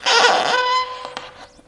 plastic rubbed
unicel frotado con cable generando feedback
feedback, plastic, unicel